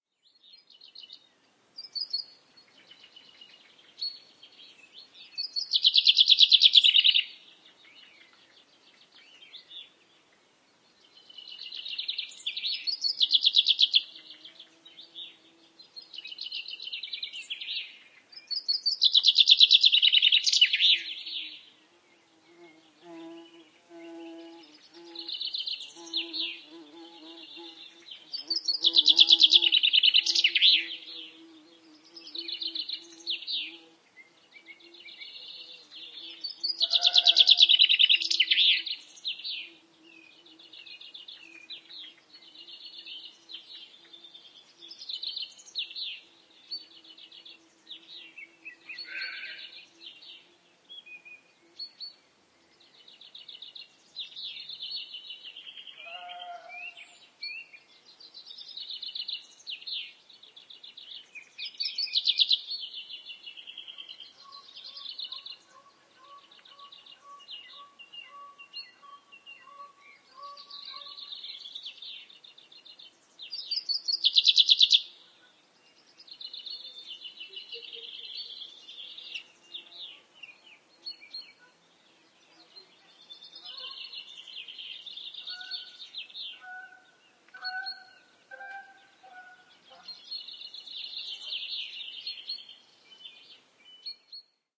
A stereo field-recording of various birds singing and calling during the evening, also present is a bee and a sheep. Rode NT4 > FEL battery pre amp > Zoom H2 line in.
birds, bird, field-recording, sheep, stereo, bee, xy, goose, geese